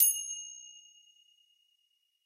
finger cymbals side05
This pack contains sound samples of finger cymbals. Included are hits and chokes when crashed together as well as when hit together from the edges. There are also some effects.
chime, cymbal, orchestral